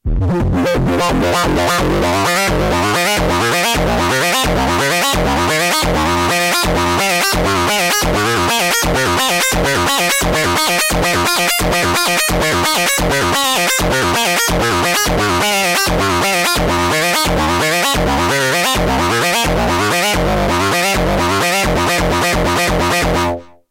Electronic Noise #57
abstract, digital, electronic, scale, sci-fi, sfx